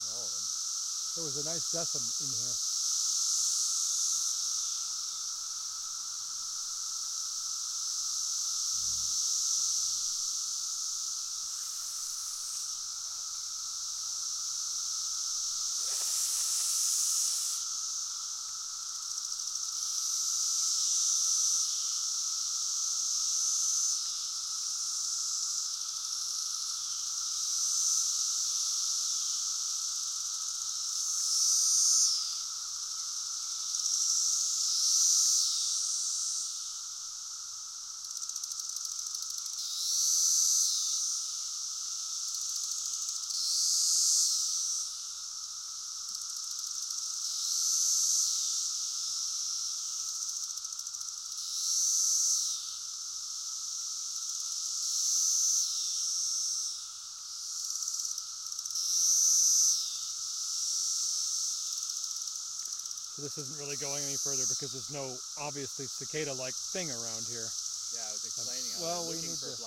Male Cicada Close Up Mating Calls with Chorus in Background
Field recording of male cicada mating song recorded at Keystone State Park in Pennsylvania, USA in June 2019.